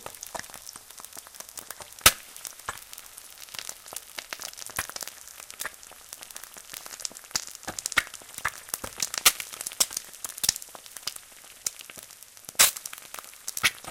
Frying an egg. Recorded using a Rode NT4 into a Sony PCM D50.